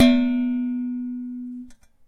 Hitting a large pot lid
bang, kitchen, lid, metal, pot